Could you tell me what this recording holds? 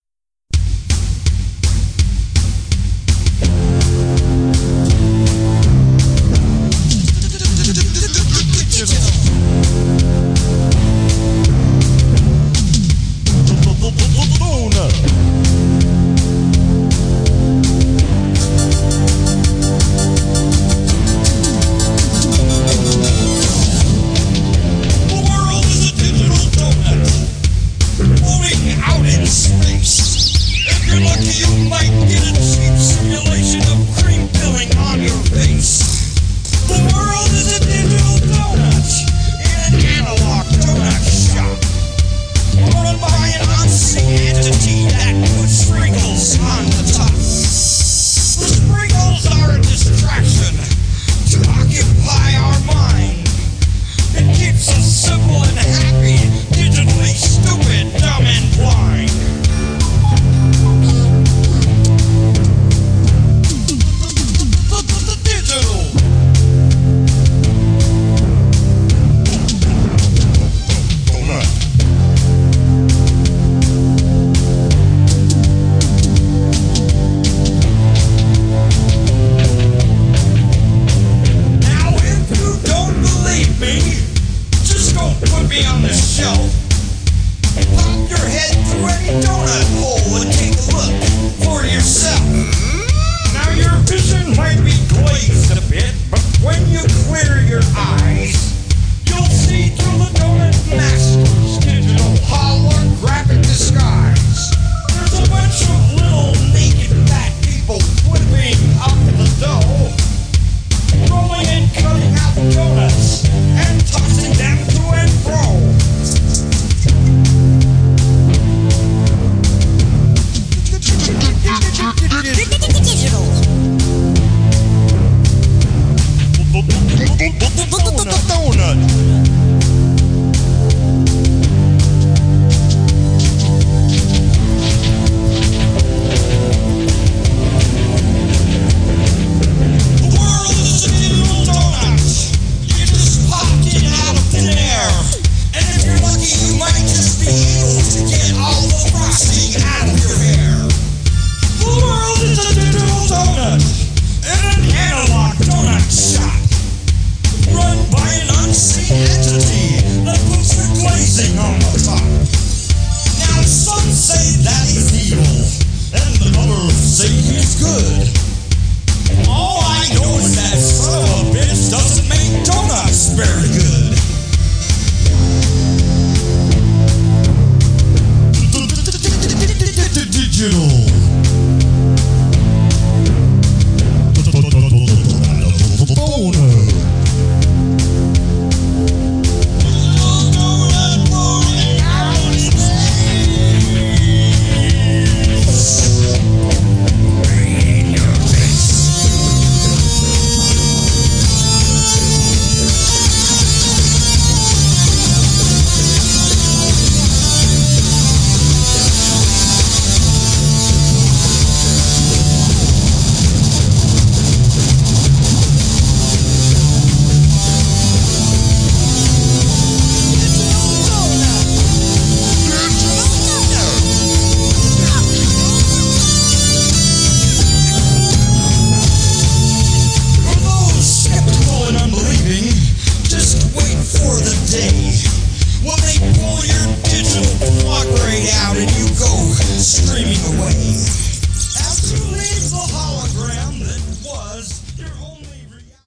All the music on these tracks was written by me. All instruments were played by me as well. If you would like to check out my original music it is available here:
TRAXIS The-Road-to-Oblivion